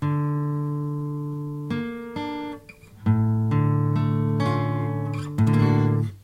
off timing abstract strum
Yamaha acoustic through USB microphone to laptop. An odd timed phrase.
chord, phrase, guitar